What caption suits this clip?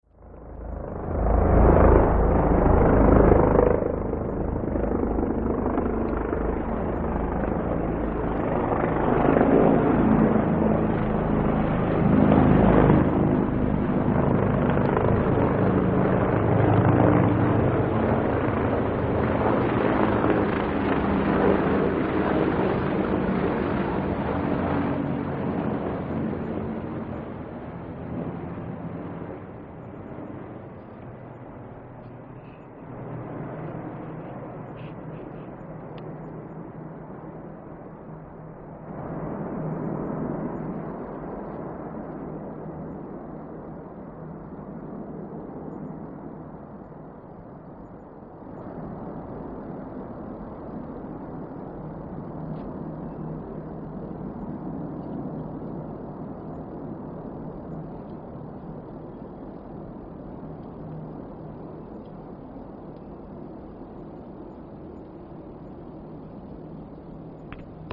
military helicoptersw2
sky sounds, helicopter raw unedited
Backyard, Live, Noise